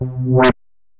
Future Garage (BASS) 04
Future Garage | Bass
Bass, Garage, Future, Tech